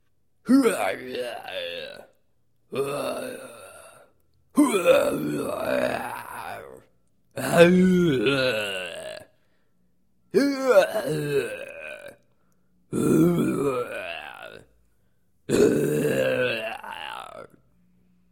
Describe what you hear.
dumb moans
Me moan and roar in my recorder like aggressive lunatic